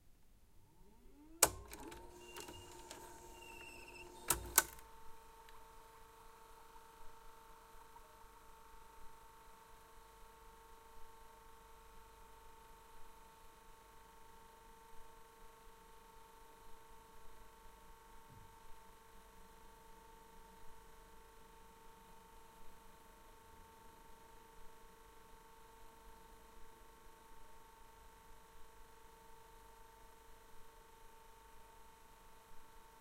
VHS Cassette Play
Playing a VHS cassette in a Philips VR6585 VCR. Recorded with a Zoom H5 and a XYH-5 stereo mic.